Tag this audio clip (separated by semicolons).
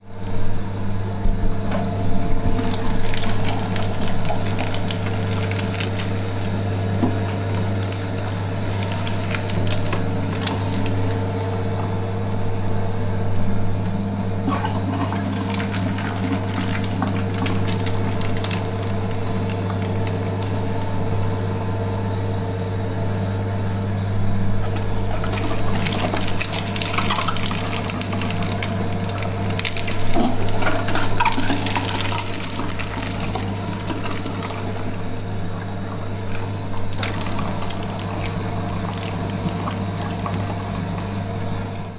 butchers; meat; handlng; squelchy